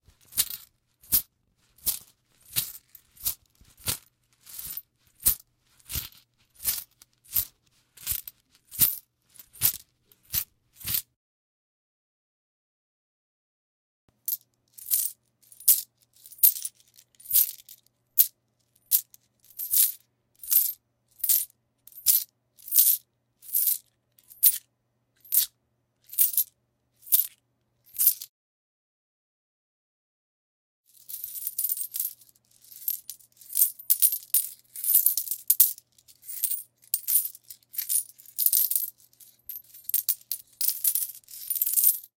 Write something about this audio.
Me juggling and fooling around with a handful of coins. At the beginning inside a purse, then directly in my hands.
Recorded with a Rode NT1000 through a Focusrite Saffire.